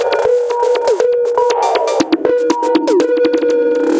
20140306 attackloop 120BPM 4 4 23rd century loop1g
This is a loop created with the Waldorf Attack VST Drum Synth. The kit used was 23rd century Kit and the loop was created using Cubase 7.5. The following plugins were used to process the signal: AnarchRhythms, StepFilter, Guitar Rig 5 and iZotome Ozone 5. The different variants gradually change to more an more deep frequencies. 8 variations are labelled form a till h. Everything is at 120 bpm and measure 4/4. Enjoy!
120BPM, beat, dance, drumloop, electro, electronic, granular, loop, rhythmic